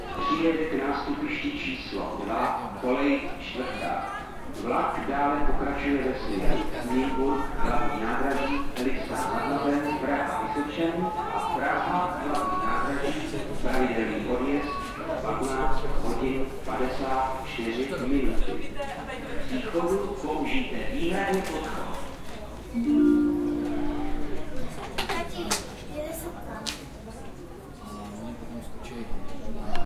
Recording on a czech railwaystation. Zoom HN4